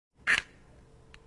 Audio of match being light up.